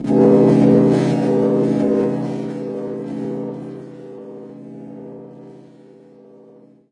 femhellovocodedel33Dslowerflange96
Sound from phone sample pack vocoded with Analogx usingufomono A4 as the carrier. Delay added with Cool Edit. 3D Echo chamber effect added then stretched more and flanger added.
3d, delay, female, flanger, processed, stretched, vocoder, voice